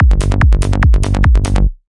Progressive Trance
techno, bassline, trance, progressive, prog